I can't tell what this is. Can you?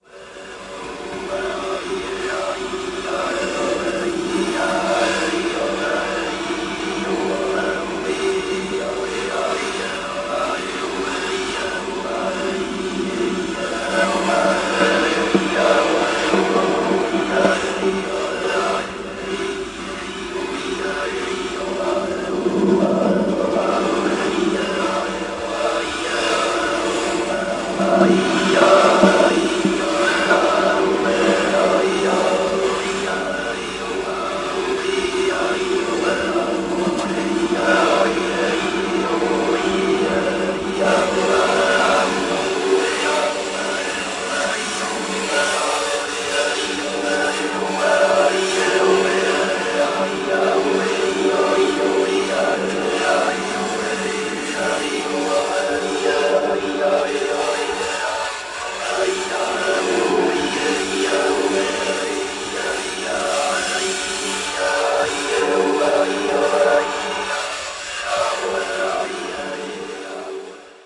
Talking Treated Brushes
Ludwig snare drum played with one brush recorded with a Sony C37A mic.
Treated with LoFi , Valhalla Shimmer and AIR Talkbox in Pro Tools.
AIR, Brushes, Snare, Talkbox